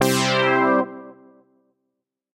fail down
Just fail music for fun.
game-over, m, wrong, fail, down, error